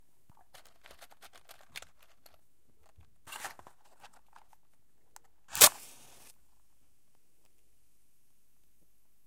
In this series of recordings I strike many Cook's safety matches, in a small plaster-boarded room. These sounds were recorded with a match pair of Rode M5 small diaphragm condenser microphones, into a Zoom H4N. These are the raw sound recording with not noise reduction, EQ, or compression. These sounds are 100% free for all uses.